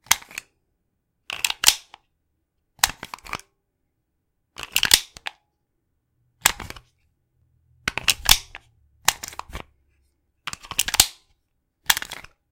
Releasing the magazine of my 9mm Taurus G2c. Recorded indoors using a Blue-Yeti microphone. Cleaned in Audacity.